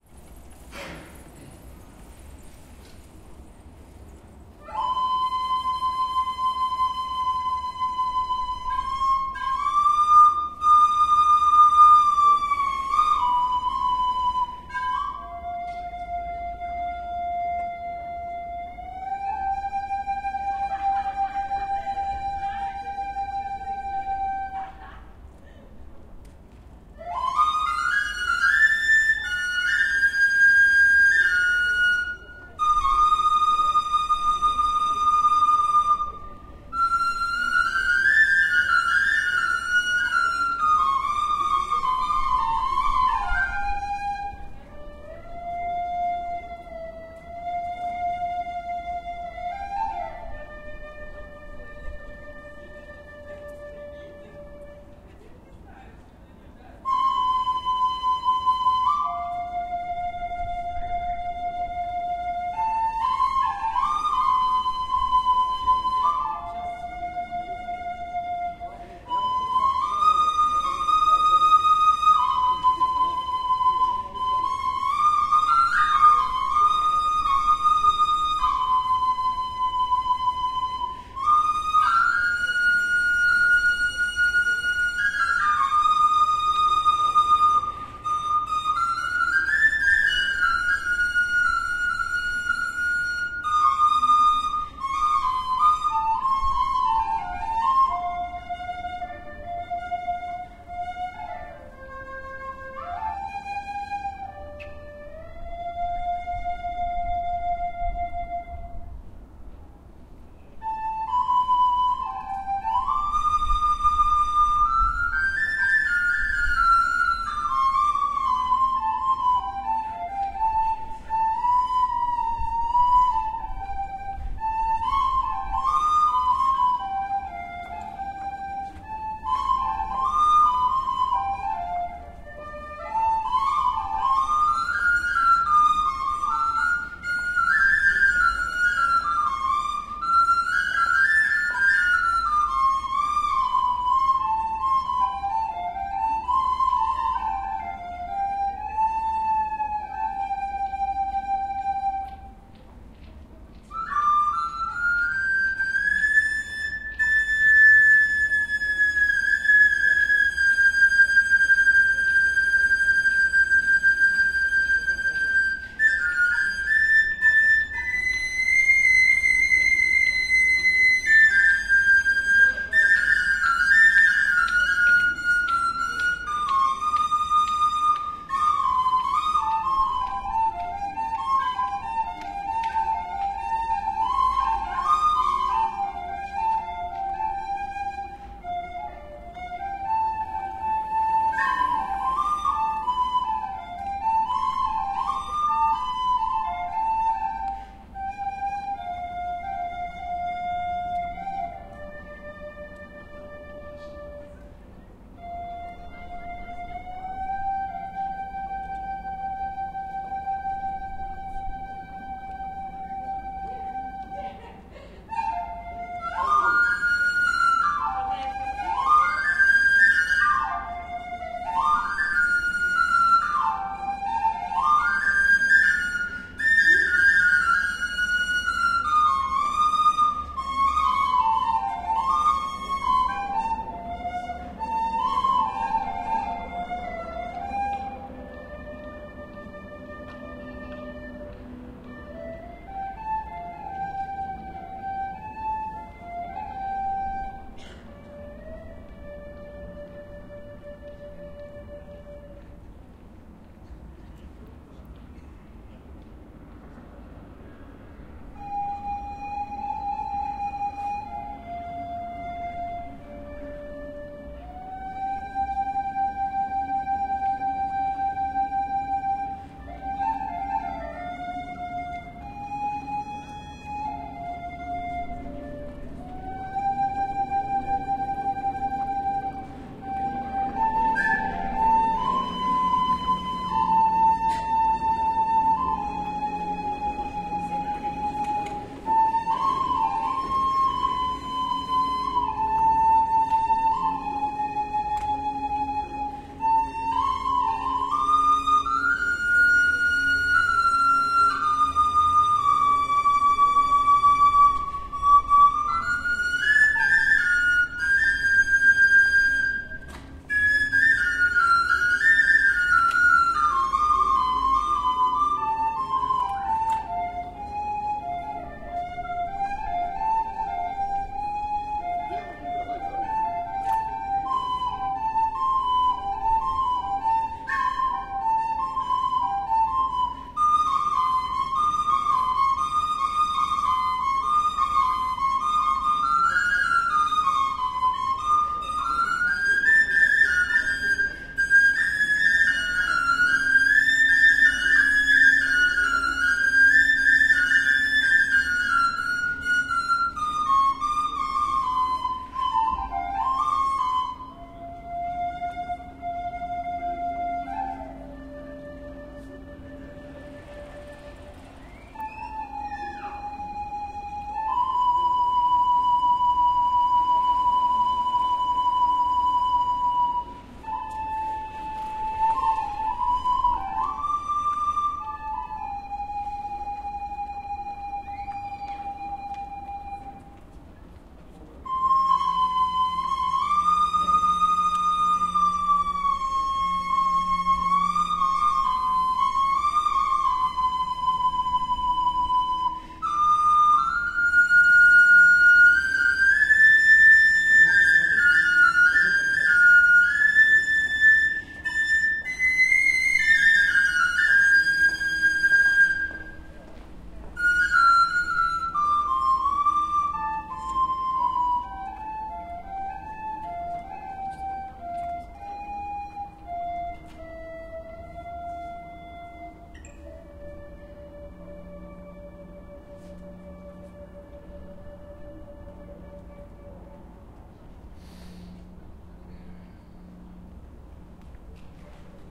Street Flute2
Musician plays flute improvisation under the city gate.
Recorded 27-05-2013
XY-stereo, Tascam DR-40, deadcat.
people, atmo, ambiance, musician, atmosphere, Omsk, ambience